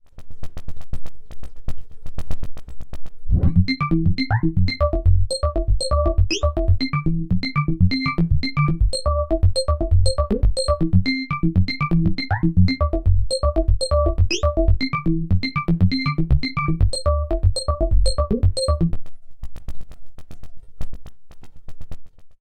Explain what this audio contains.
120bpm bleepy loop. Made on a Waldorf Q rack.